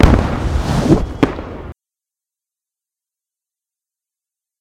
hit with swooshed material
recording of a firework explosion mixed with recorded swooshed fabric
ambience, distant, explosion, fabric, fire, fireworks, hit, loud, material, mixed, outside, swoosh